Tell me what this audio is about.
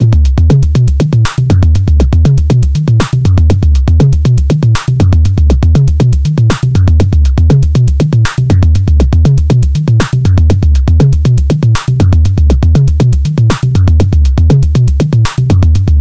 Loop created in NI Reaktor
glitch, loop, reaktor